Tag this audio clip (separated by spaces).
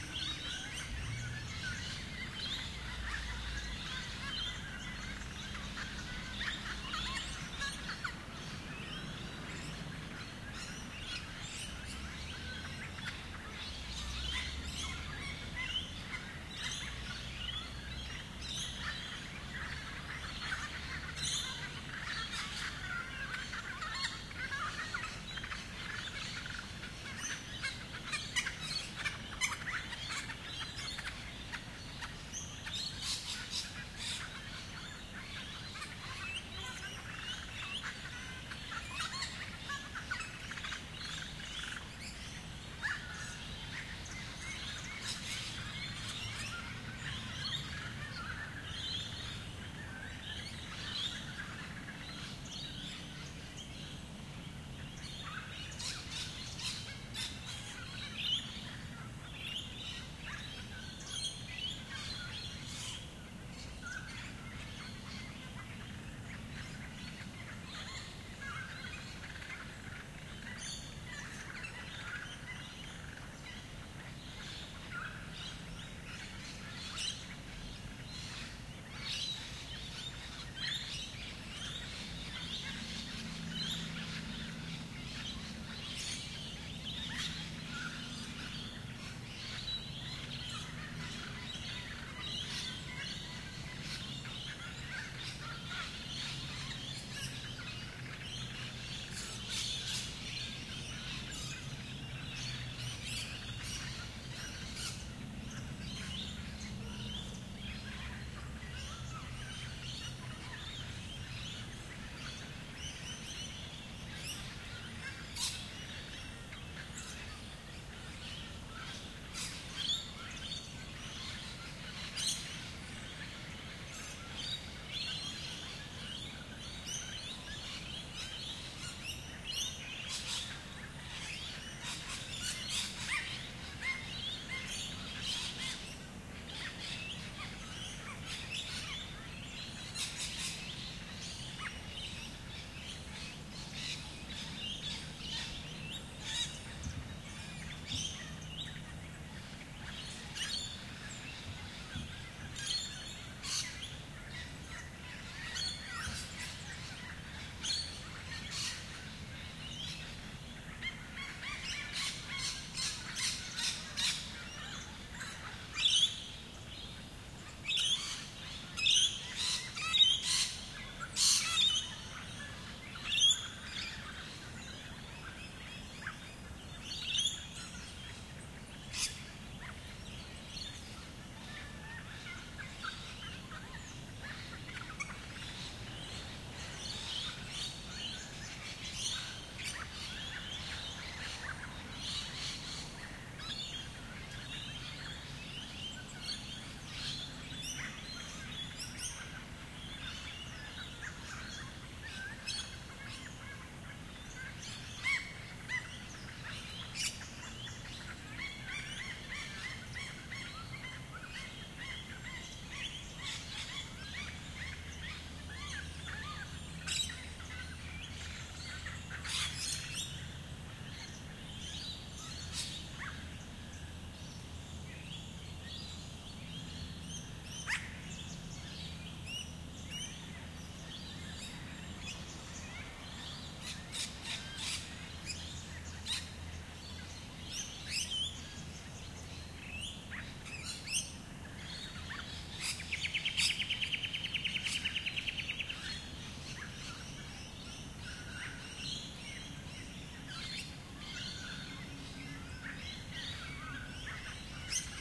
nature ambiance trees field-recording